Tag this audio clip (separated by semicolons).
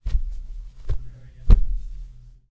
Furniture; Hit